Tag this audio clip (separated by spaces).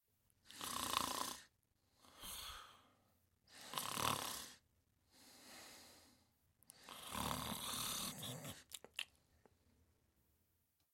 descansar dormir o Sue